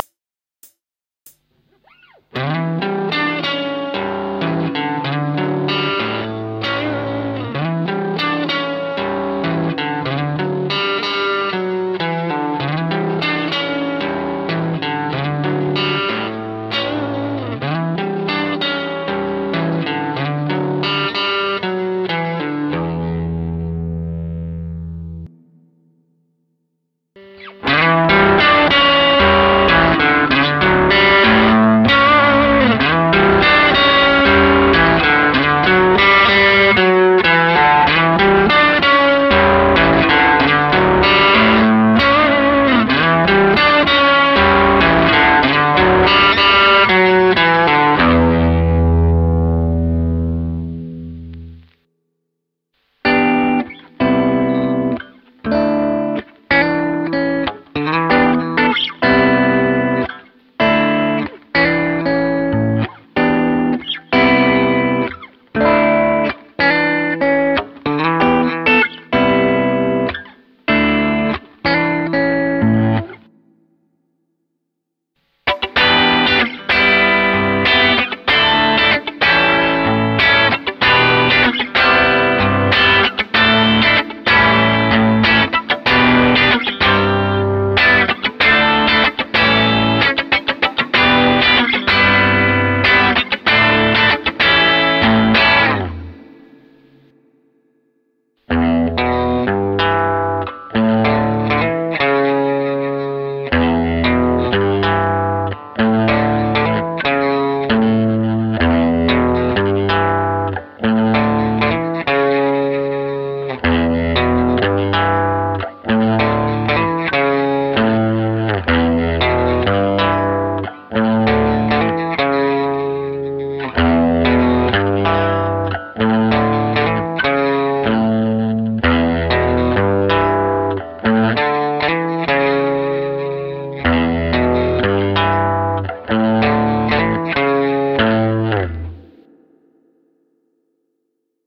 This is a set that came out of exploration of one idea and chord sequence. I played it in different dynamics and pickup combinations (basically all rhythm parts are both pickups and riffs are neck pickup but I'm sure you can tell that right away). The chords are Em7/Cmaj7/Dsus2/A7.